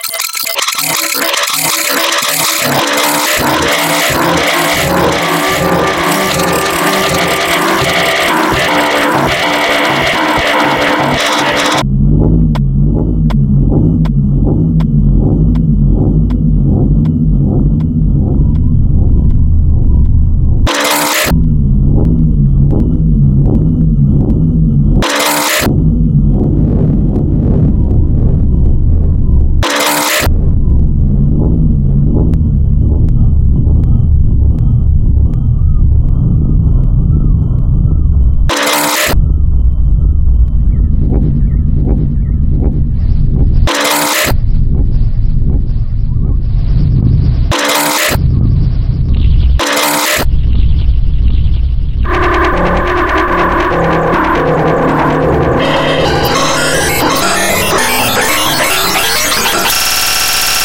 This sound need no explanation. If you play this one minute clip with full volume in darkness and being aone, your hair will rise.
creepy, fear, terror, horror